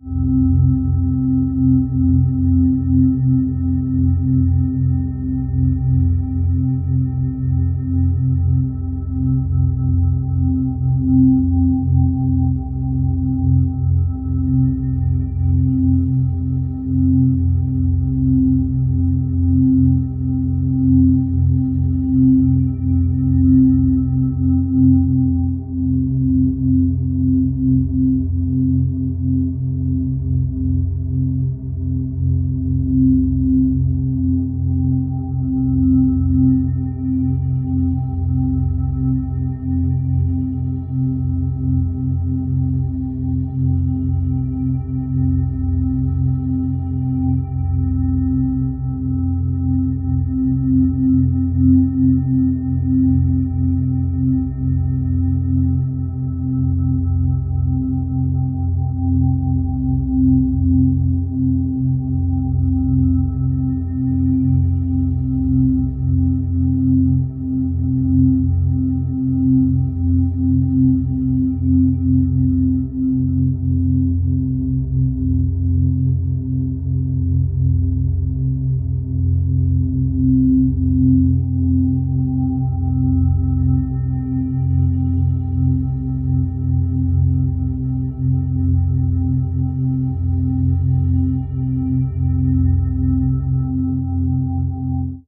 Synthesized drone.
Created using the Electribe 2 with its synth engine.
Post-processing was done in Cubase
More stuff in a similar vein:
tension, space, synthesized, deep, ambience, haunting, soundscape, low, drone, intense, eerie, dark, horror, atmosphere, bordun, epic, scary, creepy, cinematic, sci-fi, alien, synth, spooky, suspence, ambient, mystical, Halloween, noise, pad